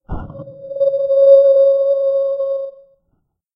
microphone feedback3
A Blue Yeti microphone fed back through a laptop speaker. Microphone held real close to invoke feedback. Sample 1 of 3, low pitch shifted down. Note that this sample has a thump at the beginning to make it sound like the microphone was dropped.